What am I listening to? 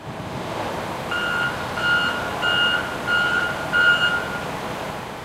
Back Up BEEP Only
Construction truck backing up -beeps only
Recorded with a Tascam DR-07 MKII
up, horn, beep, sound, loud, short, trucks, construction, back, diesel, reverse, dirt, beeps, truck